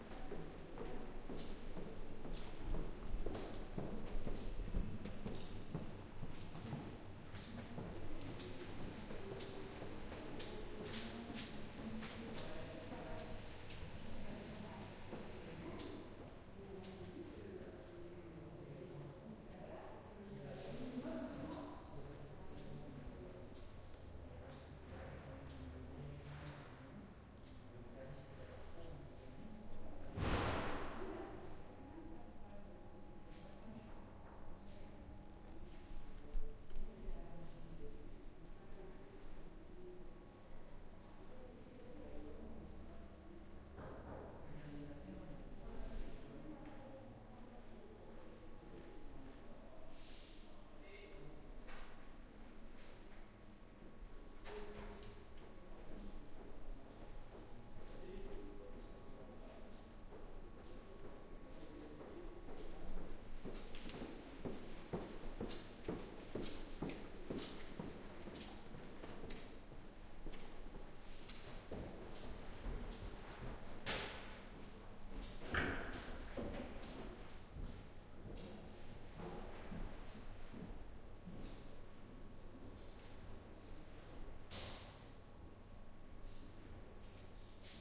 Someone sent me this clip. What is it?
Queneau Dans couloir calme
prise de son fait au couple ORTF dans un couloir de lycée, pas, calme
voices, step, field-recording, foot, crowd, people, hall